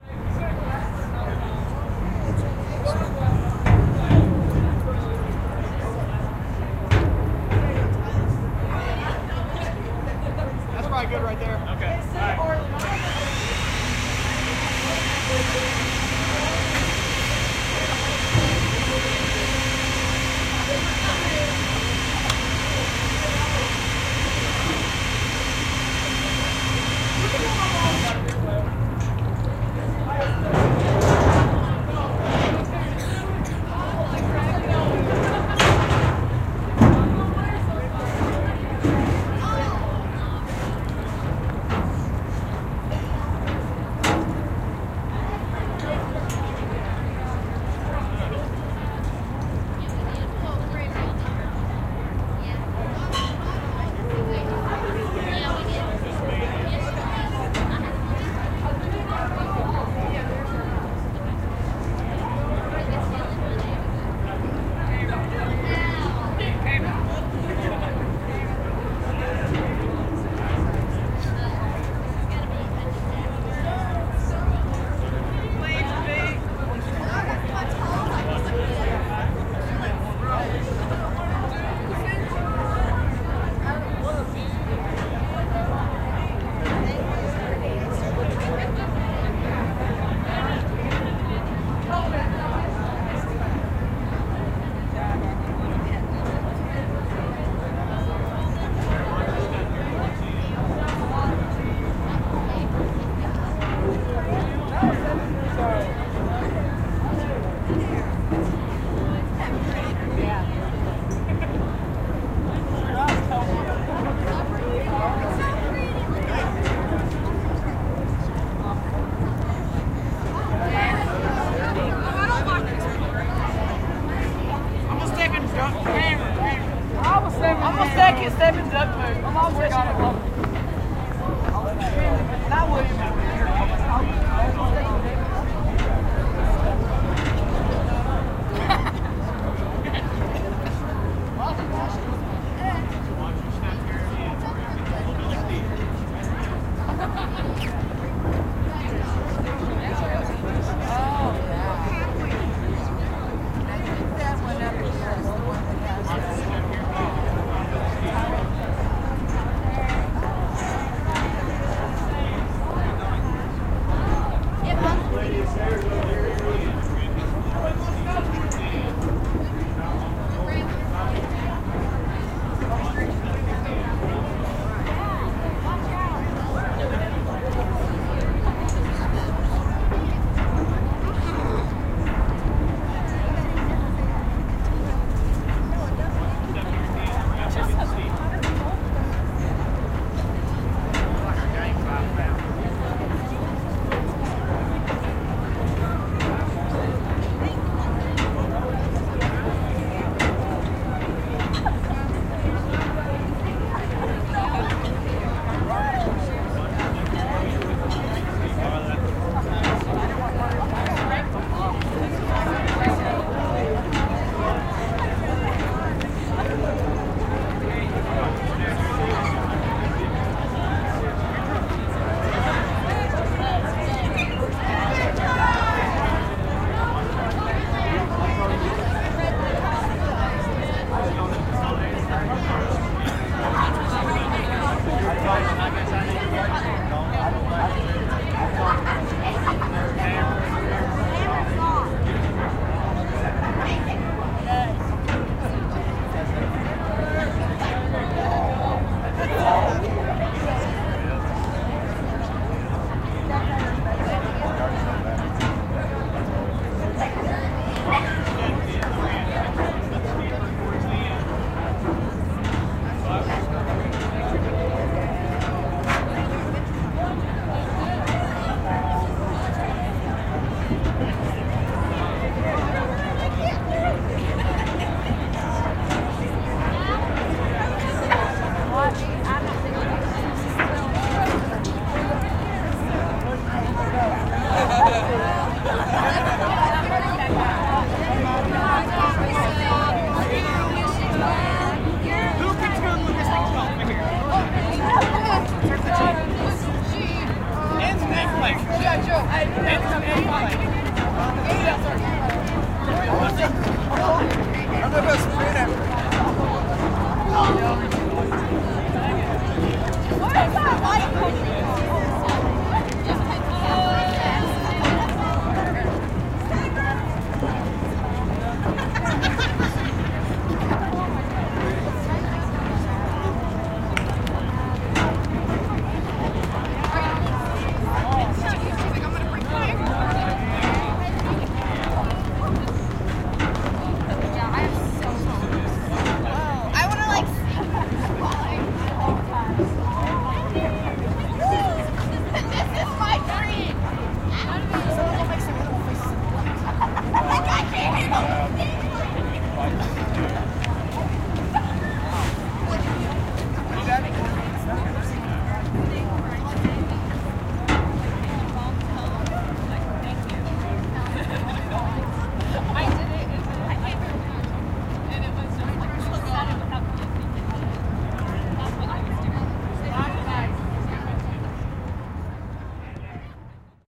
Disembarking Riverboat Crowd
A crowd of tourists disembark from the Belle of Cincinnati after a tour along the Ohio River. Crowd moves from right to left in the stereo image. There may be some mention of stepping in duck poop by one of the tourists, but I will leave that up to you to confirm.
GEAR: Zoom H6, XYH-6 X/Y capsule (120 degree stereo image), Rycote Windjammer, mounted on a tripod.
ADPP, belle, boat, boats, cincinnati, dock, ohio, river, riverside, shore, tourism, waterway, waves